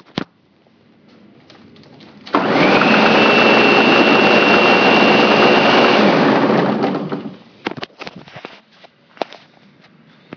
This is me recording the sound of a snowblower.
MAchine, SNow-Blower, Tool